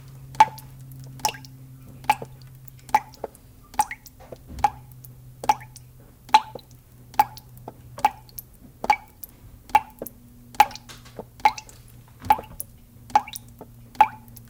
Water Driping 6
Shower Water Running Drip Toilet
running, shower, water, drip, toilet